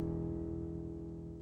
Hum Slice
Slice of sound from one of my audio projects. An instrument hum. Edited in Audacity.
Hum, Effect, Ambient, Slice